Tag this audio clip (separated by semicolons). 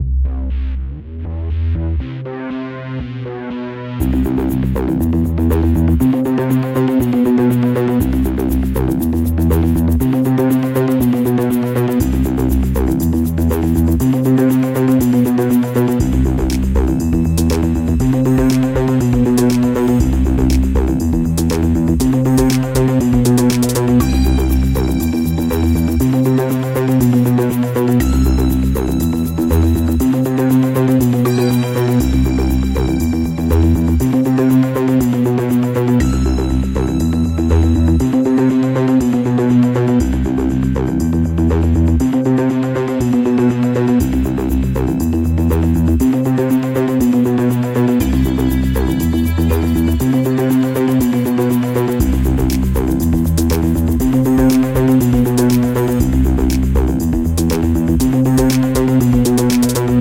ambience; upbeat; synth; thriller; electro; ambient; electronic; sci-fi; backing-track; dark; drama; spooky; atmosphere; background-music; creepy; music; chilling; haunted; horror; funky